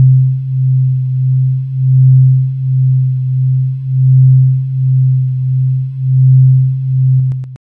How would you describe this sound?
Detuned sine waves